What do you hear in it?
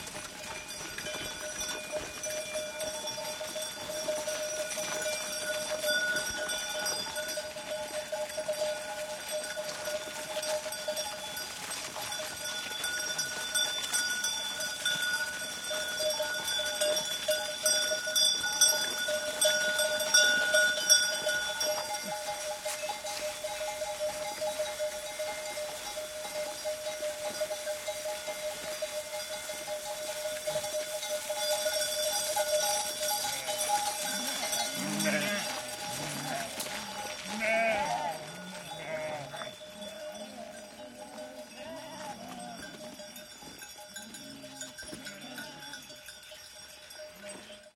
Chasing the Shepherd
This is me chasing a shepherd with his sheep.
Recorded with Sound Devices 302 + 2x Primo EM172 Omnidirectional mics.
baa, sheep, bell, shepherd